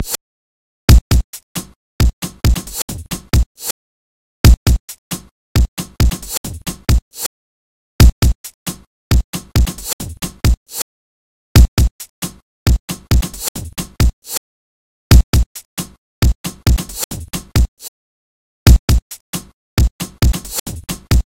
Breakcorey loops recorded around 270 Miles Per Hour. Took a few
breakbeats into Zero X beat creator and exported each piece (slice it
breakcore, drums, jungle